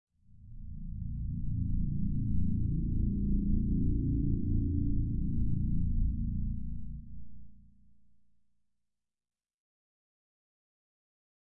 Noise heavily processed with chorus, with slight reverb and delay added for fx, and LFO set to LP filter cutoff.
bass, low-frequency, noise